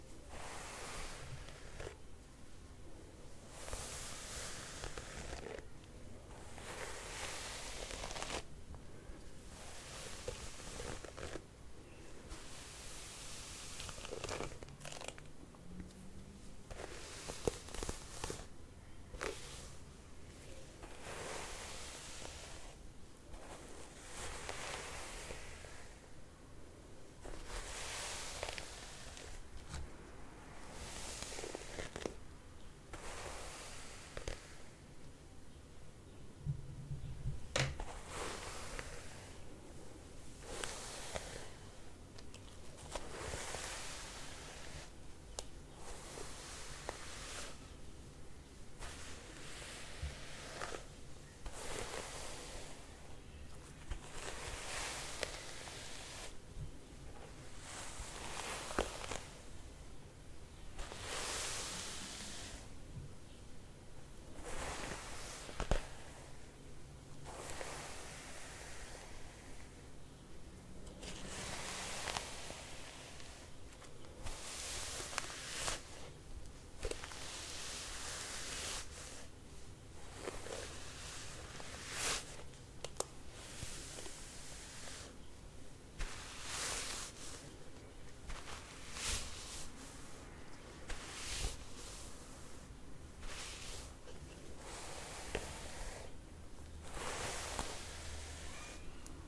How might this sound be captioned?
Sound of brushing long hair
hair, long, brushing, comb, paddle, asmr, hairbrush